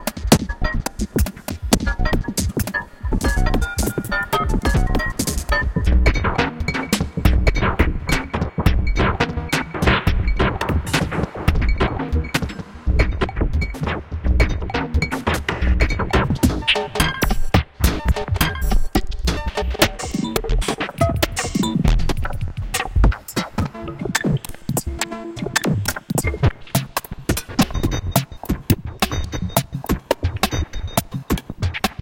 This loop has been created using the program Live included Ableton 5and krypt electronic sequencer drums plug in in the packet of reaktorelectronic instrument 2 xt